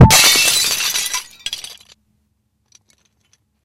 Glass Smash
The sound of glass being smashed with an object. No post filtering.
glass-shatter, shatter-glass, shatterglass-smash, Glass-break